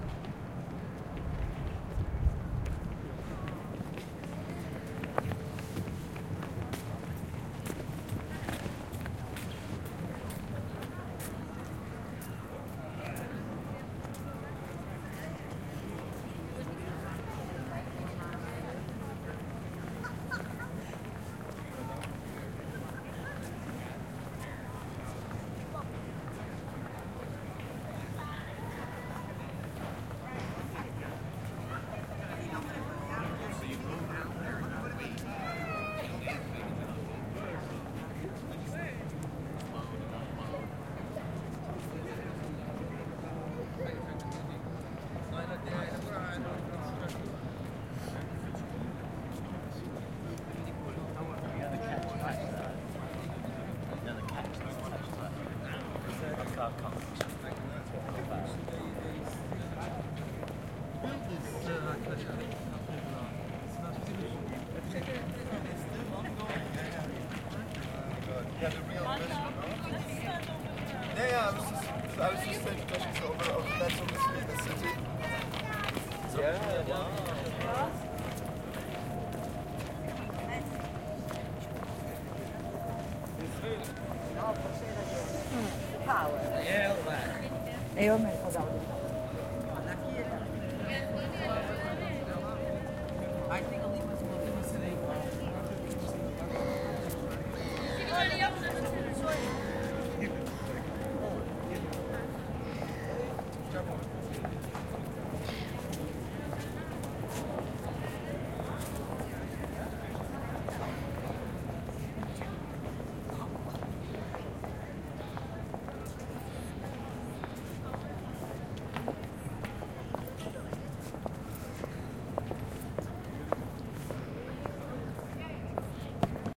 130216 - AMB EXT - thamesis from city hall
Recording made on 16th feb 2013, with Zoom H4n X/y 120º integrated mics.
Hi-pass filtered @ 80Hz. No more processing
Ambience from river thames at city hall exterior
steps, thames, city, crowd, wind, drone, london, river, people, hall